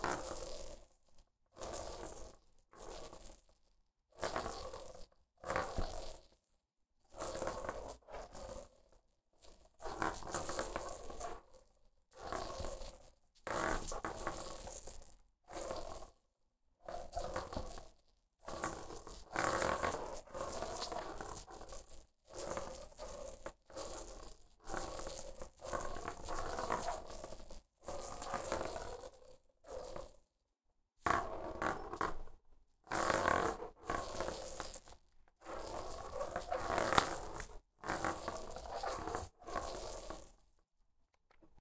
d100roll(GATE)
A 100-sided die (kind of like a golf ball with a few BBs inside) is rolling on a hard surface in front of a small recorder. Lots of panning action here.The sound has been gated lightly for noise reduction.
dice, panning, exotic, rolling